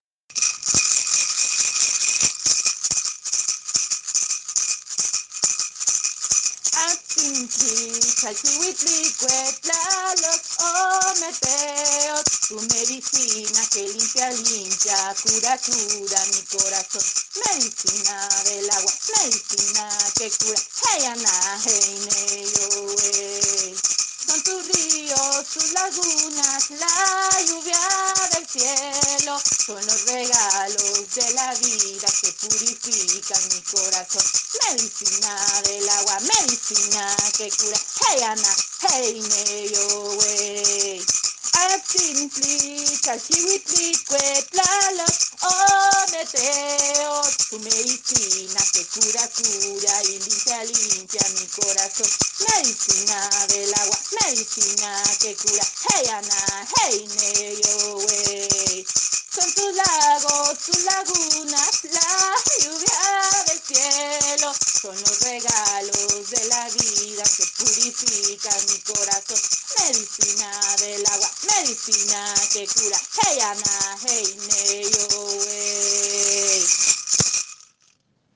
Lesli canción04
Canción de la cultura ancestral mexicana. Song of the ancient Mexican culture. Record with an Hjuawei Cell Phone.
flok, cancion, aztek, sing, mexica, canto, azteca, song